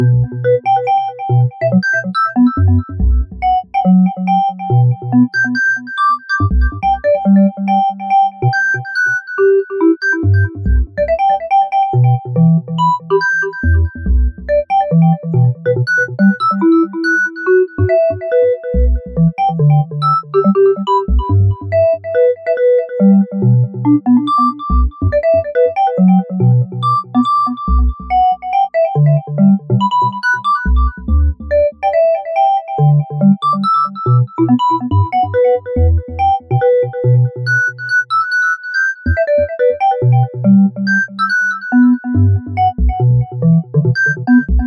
Barbie Vogue
very minamal uptempo sub bassy sound with some hi end melodic hits
made in ableton using Zebra
bounce, club, dance, electro, house, minimal, techno